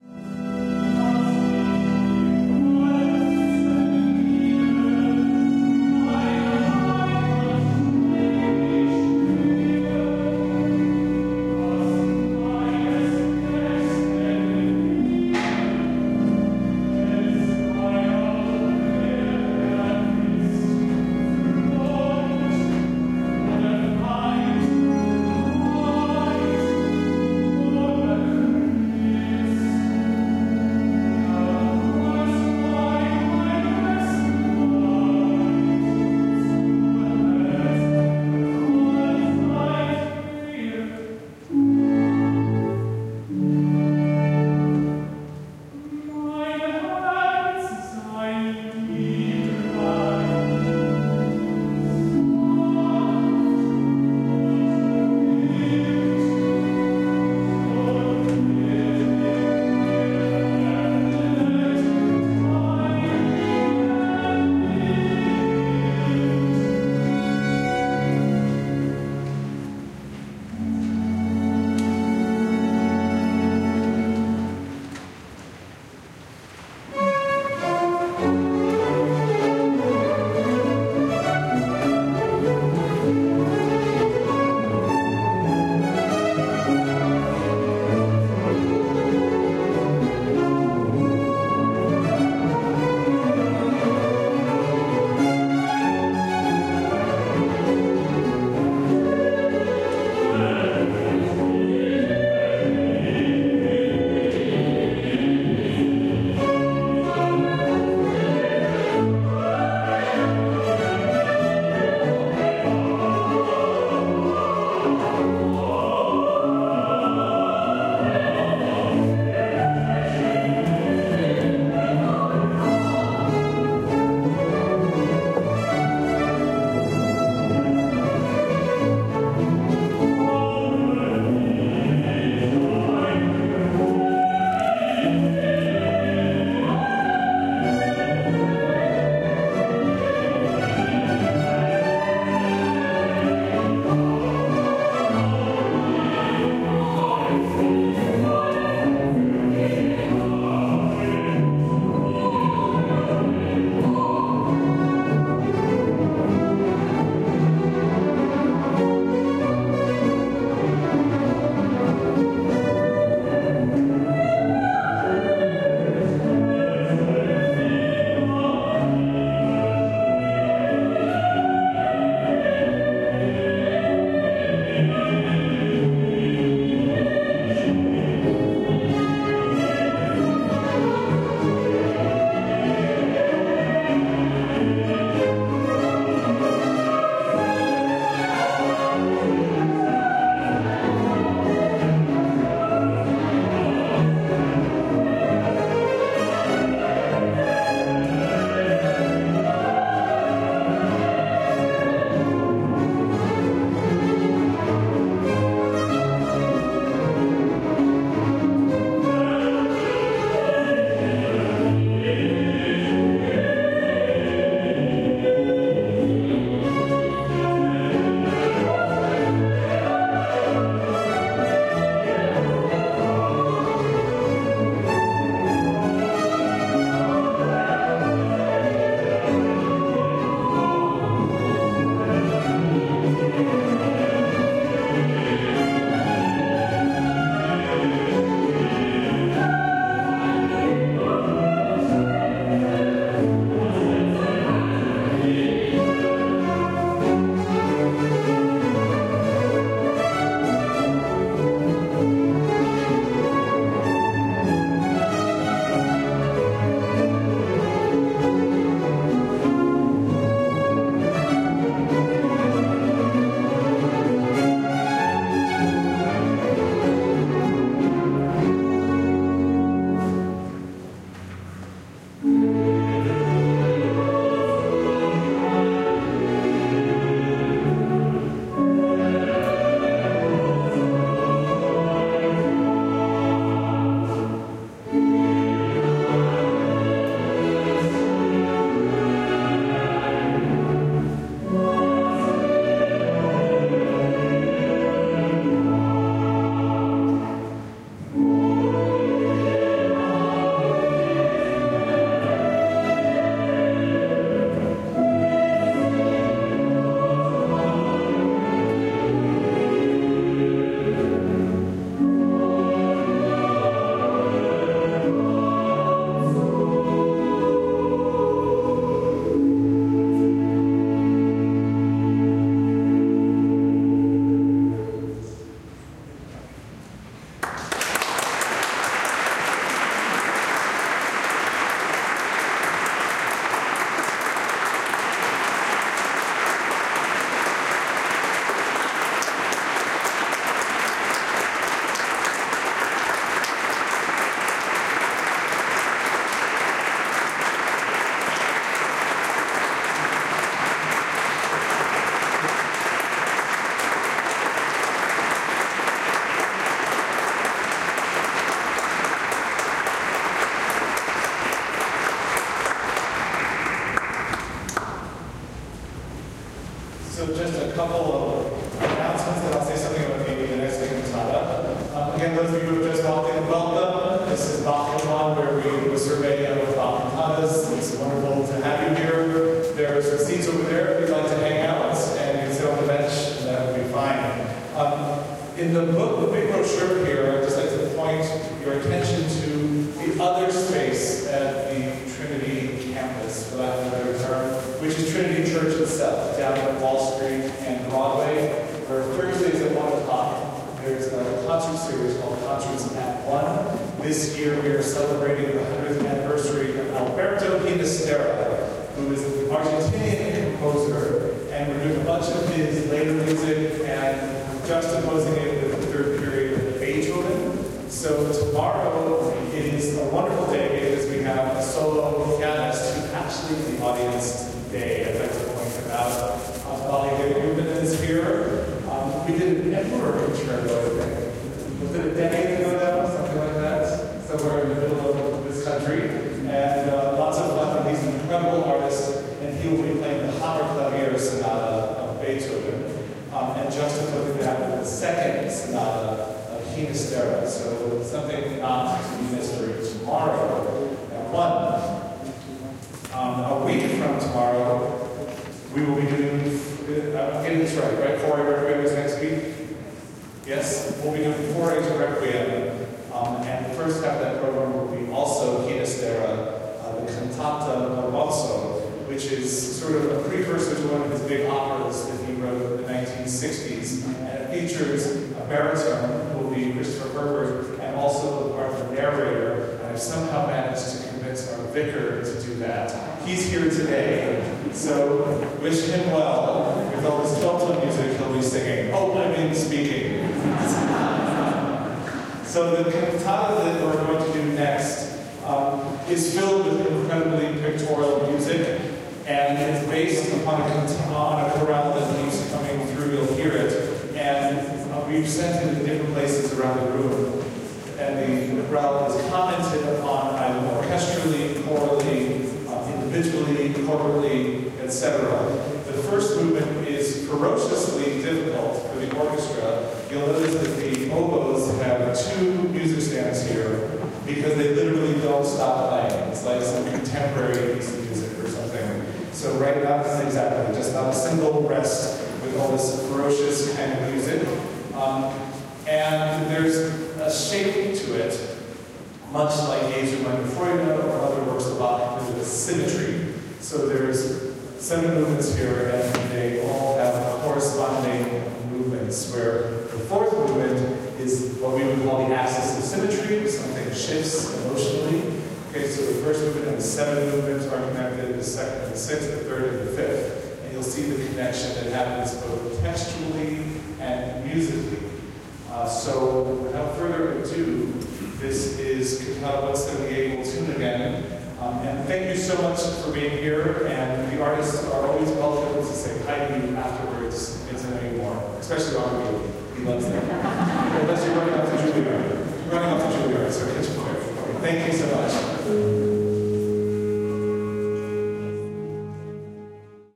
Bach at St. Paul's Chapel
13.4.2016
The Choir of Trinity Wall Street and Trinity Baroque Orchestra perform the cantatas of Johann Sebastian Bach each week as part of “Bach at One.” A devout Lutheran, Bach composed 200 cantatas using both sacred and secular texts. Many of those cantatas are heard in churches around the world every Sunday, but through Bach at One, your Wednesday lunch hour can be enriched by these timeless works. The New York Times has praised the “dramatic vigor” of Bach performances by Trinity artists, not to mention “the buoyant, elegantly shaped orchestral sound” and “the lithe, immaculate and colorful singing of the chorus.”
In the spring of 2016, Trinity’s ever-popular Bach at One series (Wednesdays at 1pm in St. Paul’s Chapel), will complete the presentation of Bach’s entire monumental output of sacred vocal music.
This week:
Franz Liszt – Fantasy and Fugue on BACH
BWV 164 – Ihr, die ihr euch von Christo nennet
BWV 178 – Wo Gott der Herr nicht bei uns hält
Broadway, choral, church, music